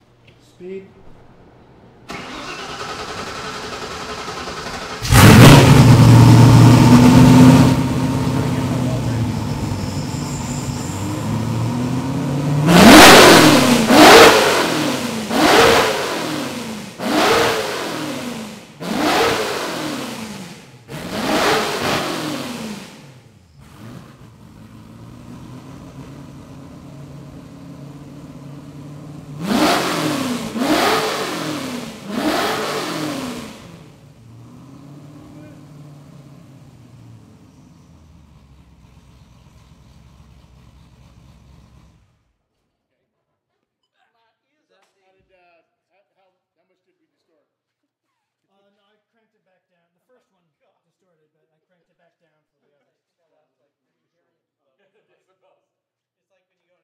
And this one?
Ford GT Engine
Ford GT Enginge starting, idleing and revving the motor, then shutting off.
Ford-GT, Race-Car-Engine-Starting, Racecar-motor